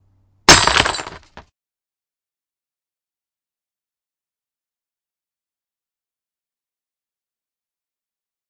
OWI CrystalShatter 2
crystal class being shattered
crack, break, crystal, class, shatter